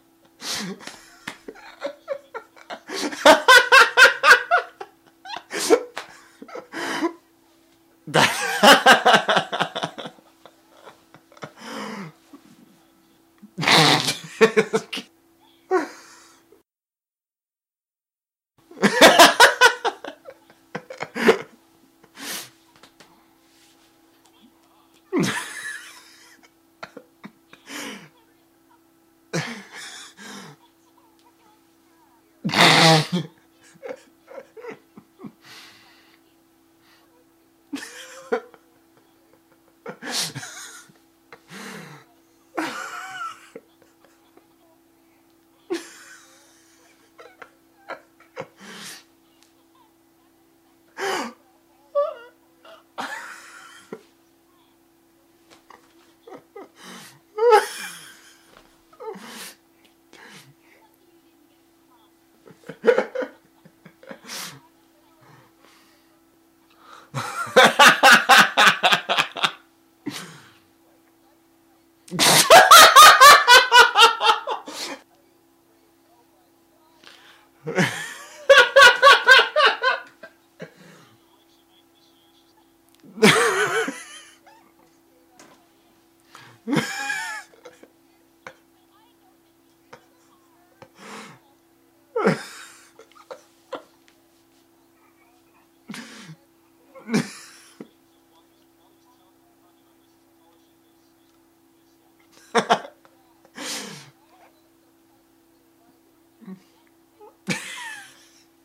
Mono recording of a man laughing in various ways.